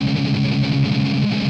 dis muted C# guitar
Recording of muted strumming on power chord C#. On a les paul set to bridge pickup in drop D tuneing. With intended distortion. Recorded with Edirol DA2496 with Hi-z input.
160bpm, c, distortion, drop-d, guitar, les-paul, loop, muted, power-chord, strumming